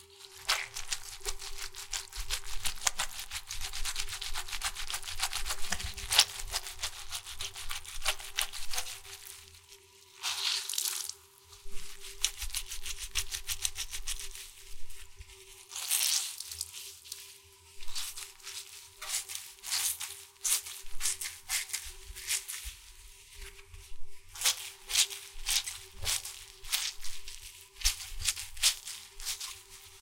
wetter rag rub
Rapidly rubbing a surface with a very wet sponge. Wringing it out and squeezing it in the middle of the file.
dishrag, rubbing, grind, cloth, squeeze, wring, friction, rub, rag, cleaning, fabric